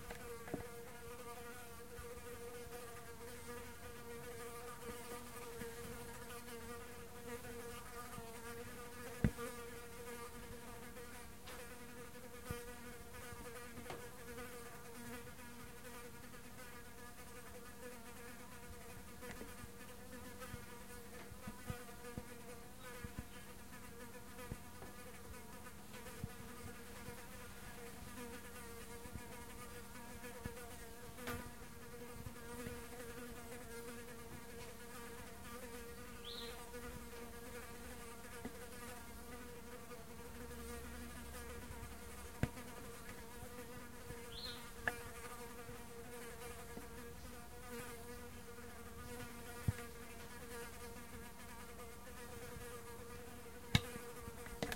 Australian Blowfly
ZoomH4n blowfly flying around at the front door - some minor back ground noises and bumps. Not a fully clean recording but nice effect.
Australian, Blowfly